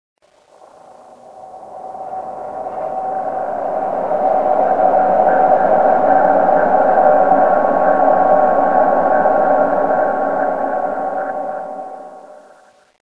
deep effect 1
I recorded more than 10 sounds while cooking food. I used one recording from inside a train and finally I recorded sounds on a bridge while cars were driving by. With these sounds, software and ways I have discovered studying at Sonic College Denmark, I created these 3 sounds. I am studying to become a sound designer and if you like sounds like this I have very many in my soundbanks. 100 % made by me.
alien, atmosphere, out, space, strange, sweep, this, underground, world